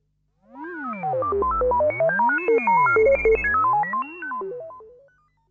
VozrobotR2C3PO
r2c3po, sounds, stars, wars, robot, psicodelic